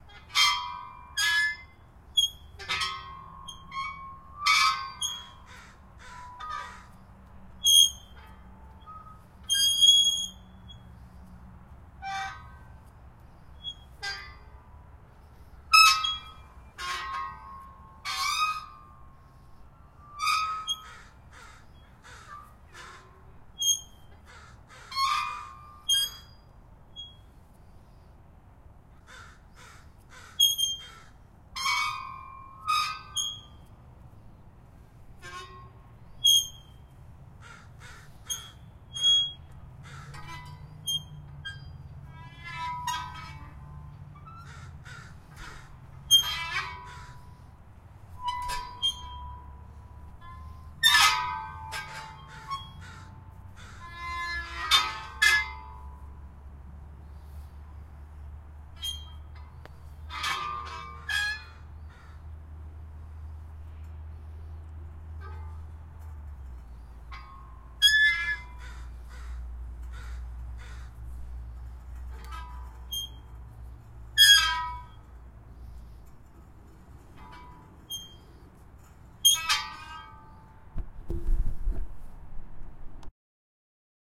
recording of a squeaky gate near a cemetery